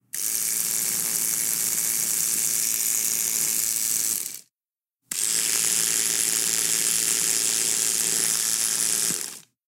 Sound of an electric toothbrush